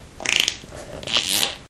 bee fart 2
aliens, car, explosion, fart, flatulation, flatulence, frogs, gas, noise, poot, race, space